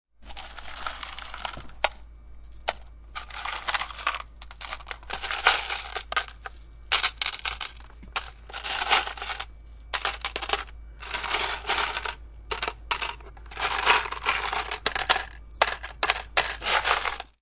Recorded at Suzana's lovely studio, her machines and miscellaneous sounds from her workspace.